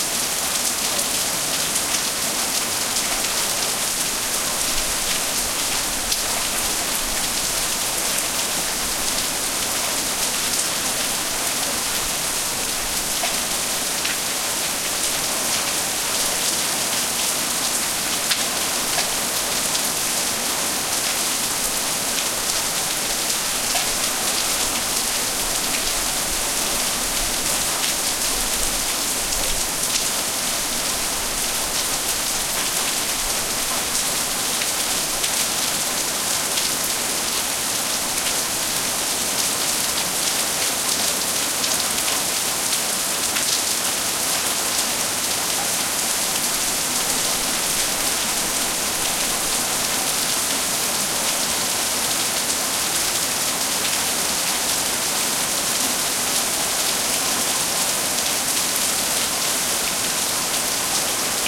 Rain on Shed Roof
Stereo recording of the sound of a heavy rain hitting a large plastic shed roof. Exterior.
weather, exterior, rain, storm, shed, field-recording, plastic, roof